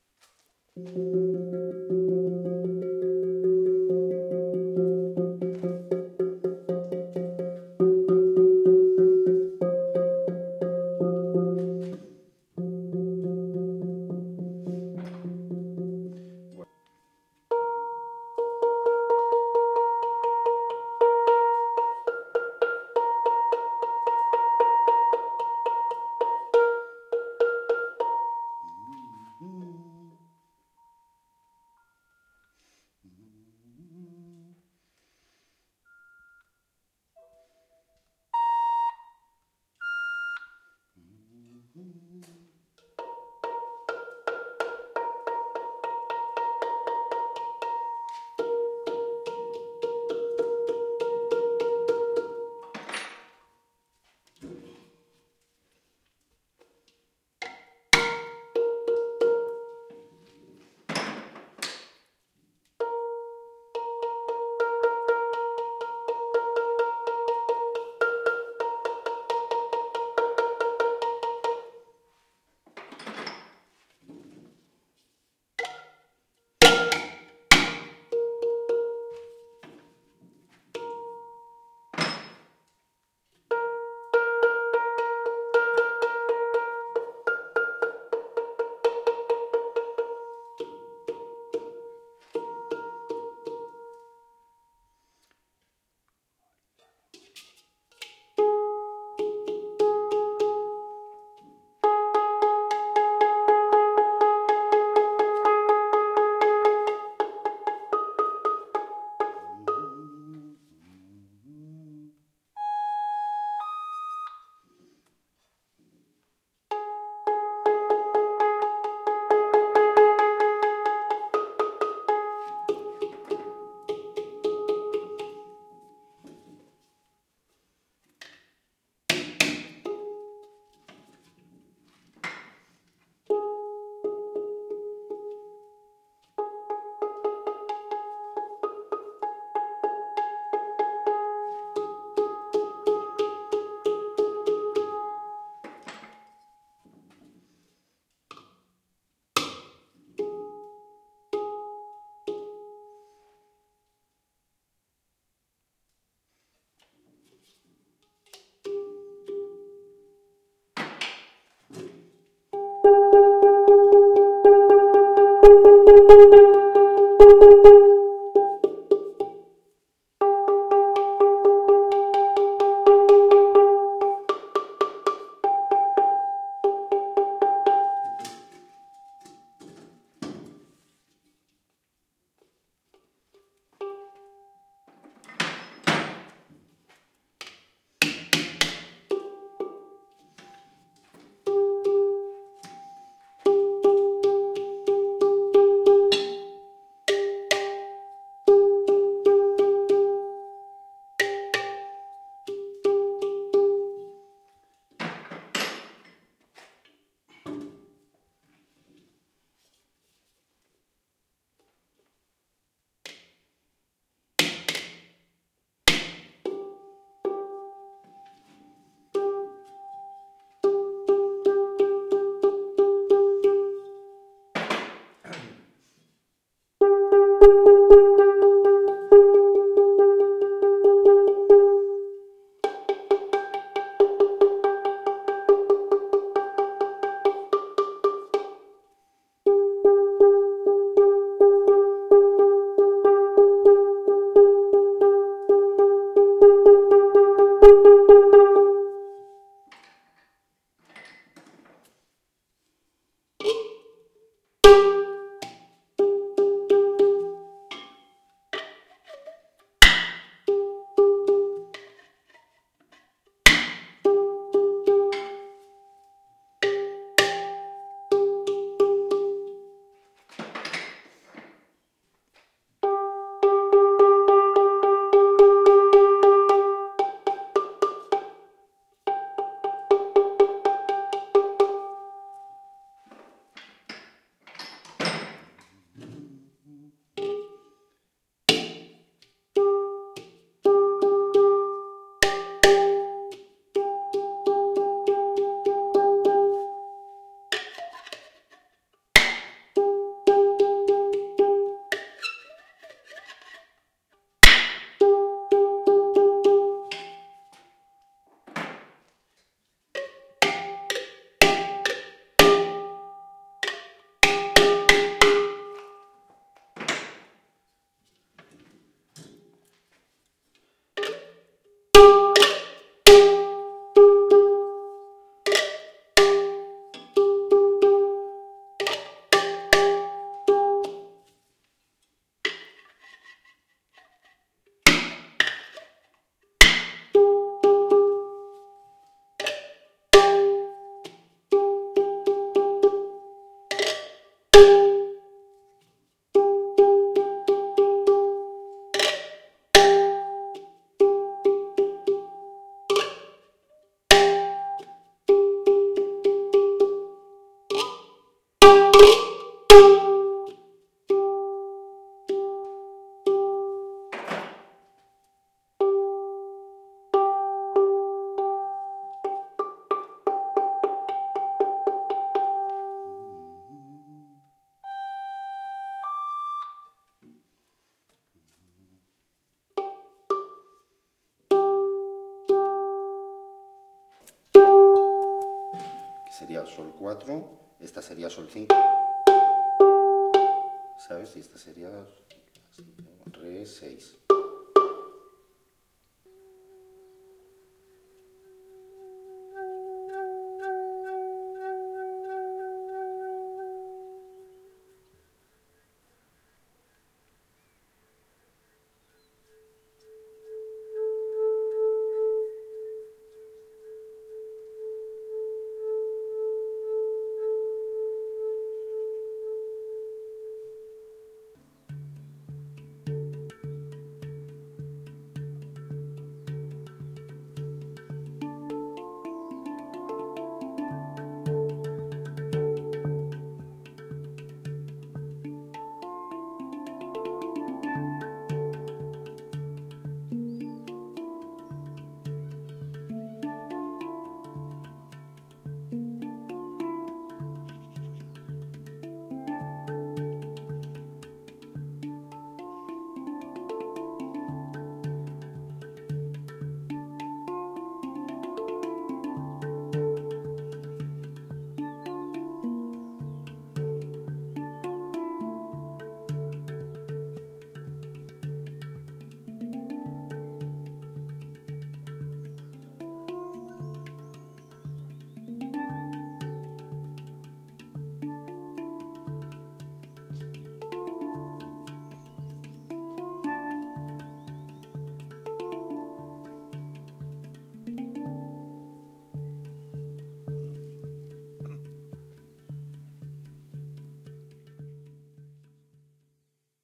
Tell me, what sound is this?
En el taller de Jaime Esquerdo, constructor de hangs.
Suenan las notas y el martilleo sobre la chapa de hierro para afinar el instrumento.
Se puede oír al artesano tocar un poco al final.
Invierno del 2015.
At the workshop of Jaime Esquerdo, hang maker.
Sound of the notes and hammering on the steel plate while tuning the instrument.
You can hear the craftsman play a little at the end.
Winter of 2015.